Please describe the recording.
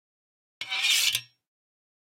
Sliding Metal 07
blacksmith, clang, iron, metal, metallic, rod, shield, shiny, slide, steel